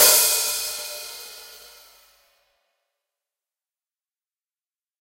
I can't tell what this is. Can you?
SkibaCustomHiHats1145Top1215BottomOpenEdgeShank
Sampled from custom-made 13.5 inch HiHat cymbals created by master cymbal smith Mike Skiba. The top cymbal weighs in at 1145 grams with the bottom weighing 1215 grams.This is a stick hit on the edge of the loosly opened cymbals using the shank of the stick and allowing the cymbals to "sizzle" against one another, as they are commonly played
custom, cymbal, drums, hi-hat, hihat, percussion, skiba